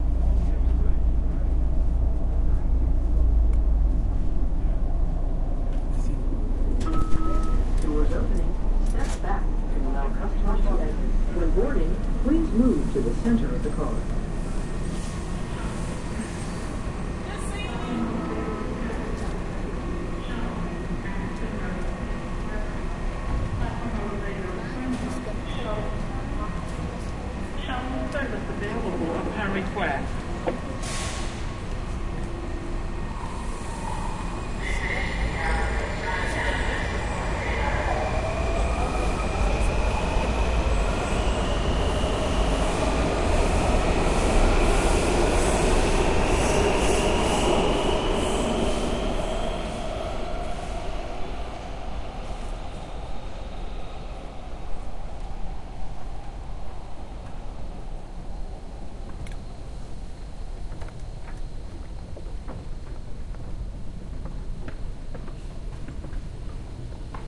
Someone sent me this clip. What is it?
Subway in Washington DC from inside
Subway station in Washington DC. Made in underground station.
subway-undergroung, subway